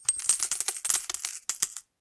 Coins - Money 01
Pop some tissue and a woolly hat in a bowl, pop that in the sound booth next to the mic and let your coins drop. Then edit that baby - cut out the gaps that are too far apart until the impacts of the coins land at the time you want.
drop, hit, Money, pop